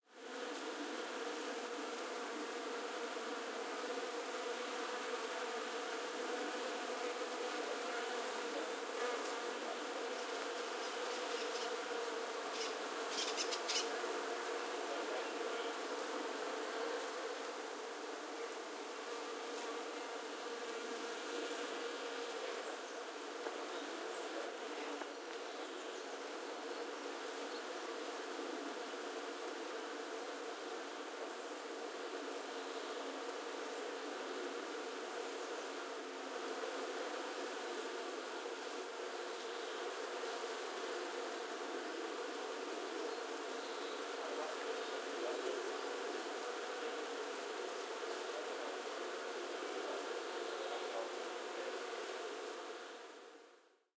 Bees buzzing around tree
As I walked underneath this tree this morning, I had to go back to capture this incredible sound of countless bees buzzing around the tree's blossoms...
spring, buzzing, insects, flowers, field-recording, Bees, garden